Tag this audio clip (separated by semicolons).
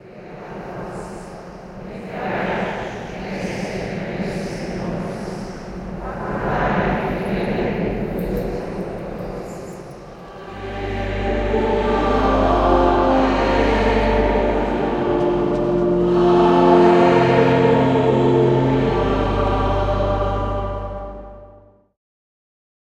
16; bit